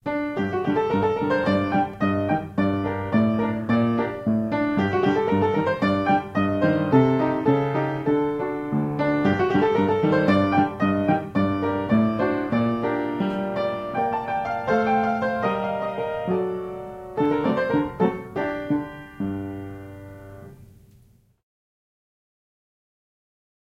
Music from "Sam Fox Moving Picture Music Volume 1" by J.S. Zamecnik (1913). Played on a Hamilton Vertical - Recorded with a Sony ECM-99 stereo microphone to SonyMD (MZ-N707)